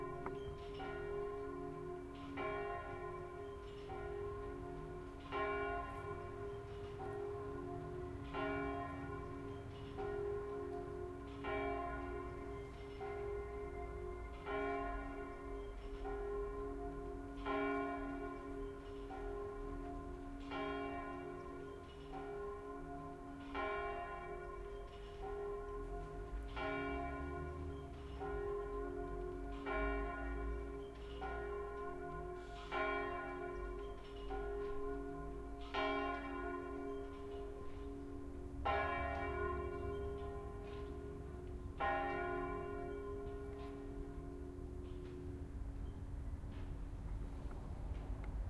church bells big
Get this sound of one church in Lisbon staying close to it.